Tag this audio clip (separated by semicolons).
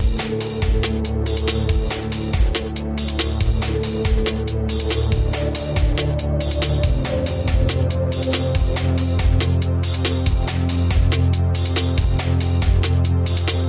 game; space; galaxy; Computer; robot